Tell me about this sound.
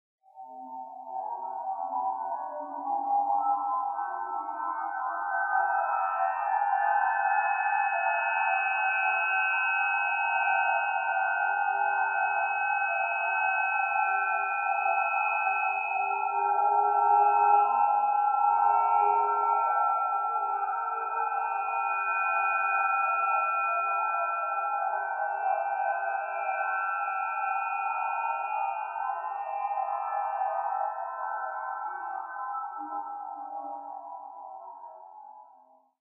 om-mani-padme hum
energy transfer ambient sound effect